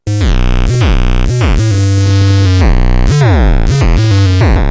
Acid Bass 2
Micron Sounds Pack
Acid Like Bass
Random Synthy Sounds . .and Chords
and Some Rhythms made on the Micron.
I'm Sorry. theres no better describtion. Im tired